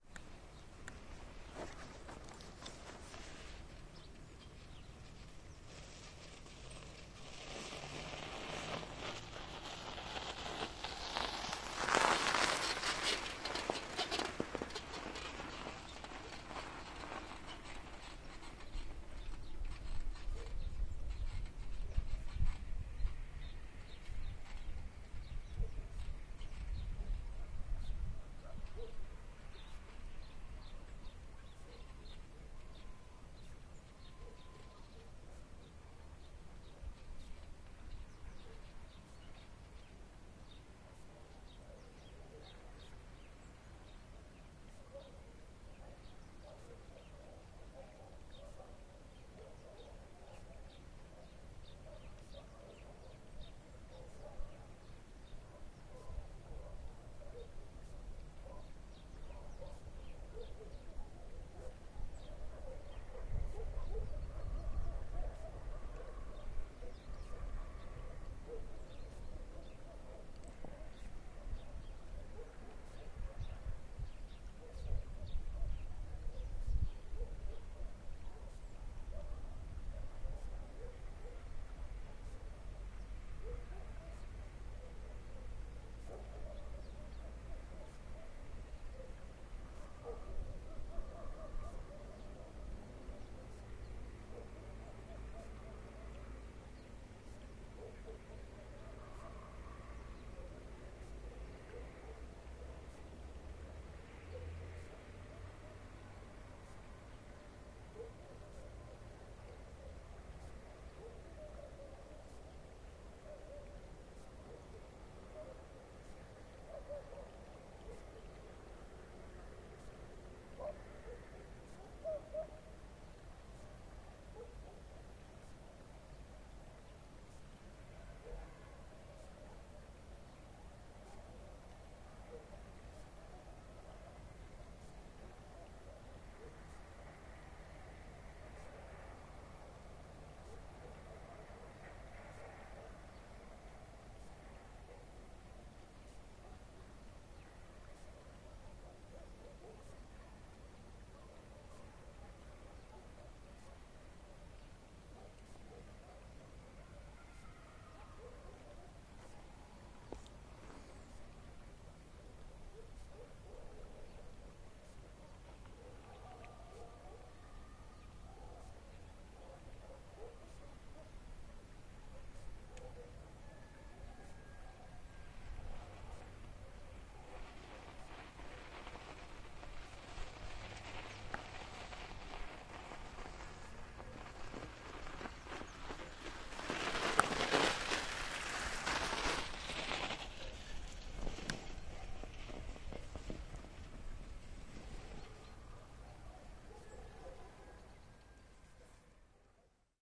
Ext, near river road, 2 bicycle, snow
Road near river, 2 bicycles pass on the snow. Village on long distance.